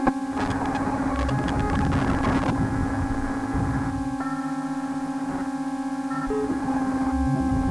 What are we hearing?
hollow minor second glitches
Casio CA110 circuit bent and fed into mic input on Mac. Trimmed with Audacity. No effects.
Bent, Casio, Circuit